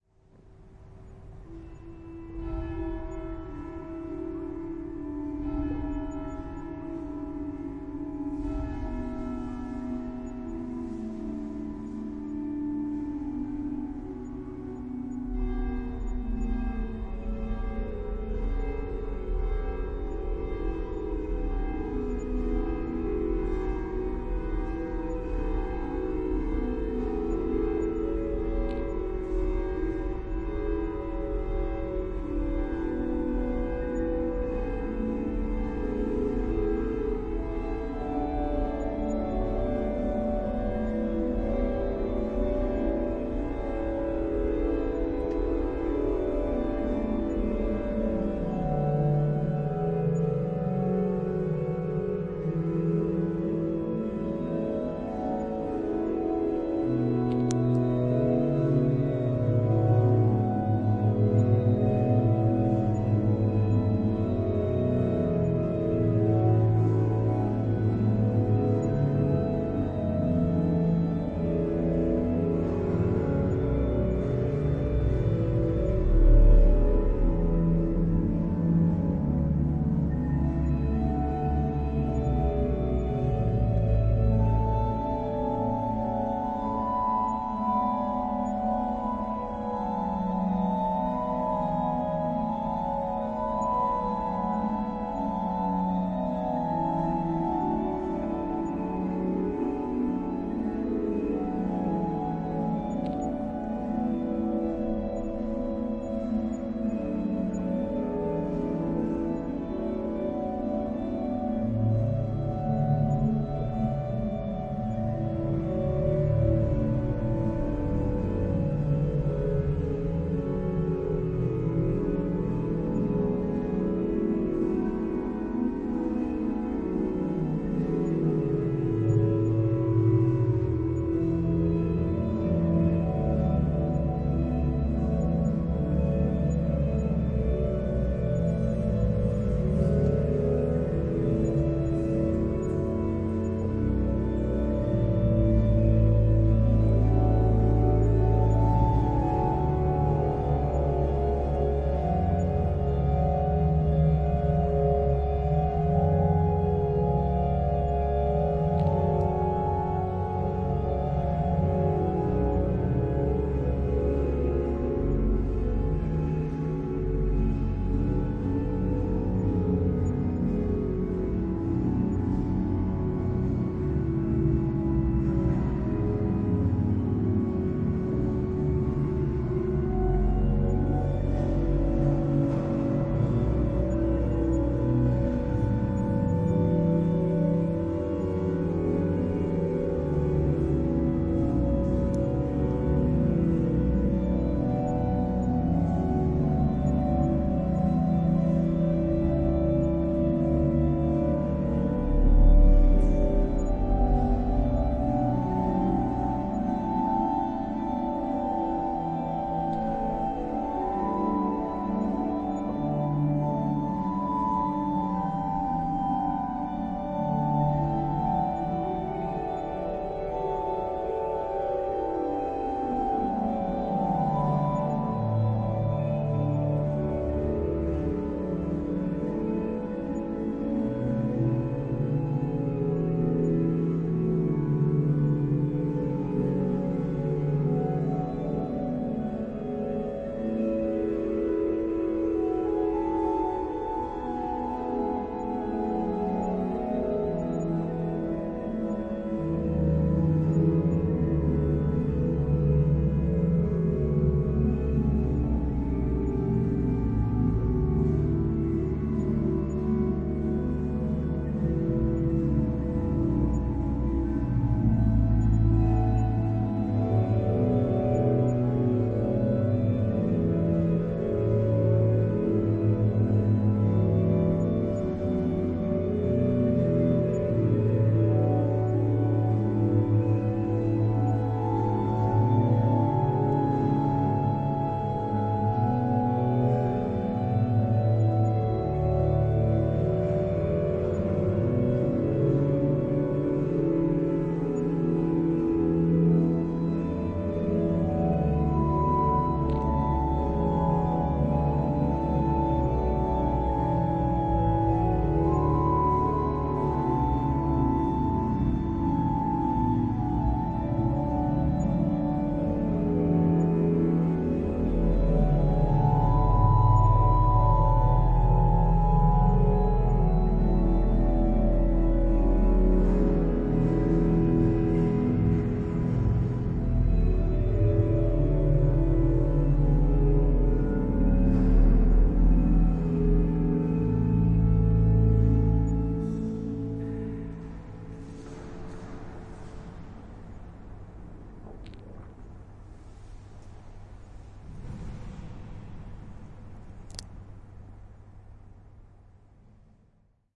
220118 1781-2 FR ChurchOrgan
Church organ, 2nd file (binaural, please use headset for 3D effect).
I’ve made this recording in Sainte-Genevieve Cathedral, in Nanterre (France), while the organist decided to practice. Belles are ringing at the beginning of the track.
Recorded in January 2022 with an Olympus LS-P4 and Ohrwurm 3D binaural microphones.
Fade in/out applied in Audacity.
ambience, atmosphere, bell, bells, binaural, calm, cathedral, Catholic, Christian, church, devotion, echo, field-recording, France, liturgy, mass, meditation, music, Nanterre, organ, religion, religious, soundscape